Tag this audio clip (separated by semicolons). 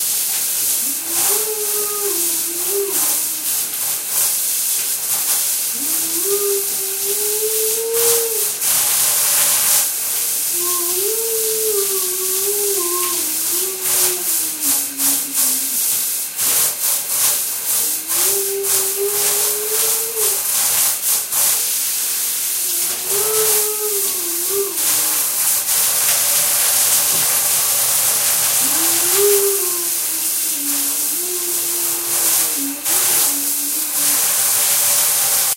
whoooo; water; singing; shower